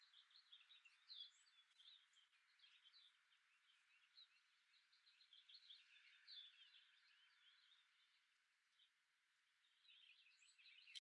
Clean Birds 2
edited file of birds sounds
birds, Clean, edited